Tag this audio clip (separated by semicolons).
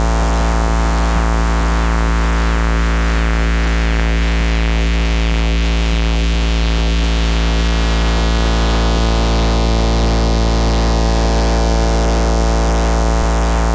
loop; drone; analog; two; cs-15; yamaha; bandpass; synthesizer; voice